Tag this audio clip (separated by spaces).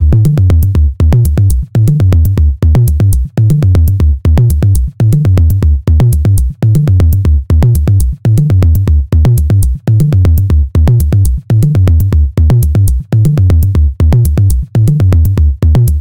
glitch reaktor